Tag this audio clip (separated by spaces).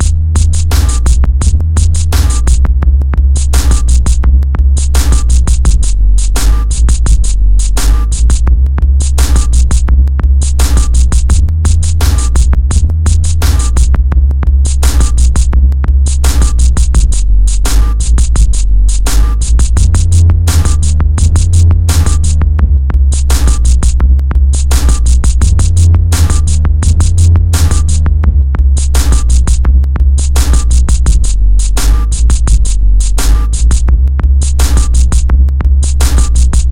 170 backing bass beat BPM drums Sequence